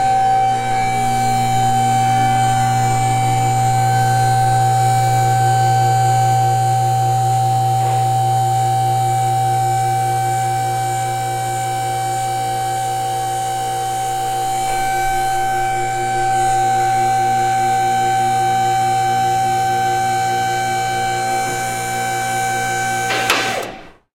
Lift,Servo
Car lift recorded in a body shop
Used a me66 to a Sounddevices 722
body-shop, lift, machine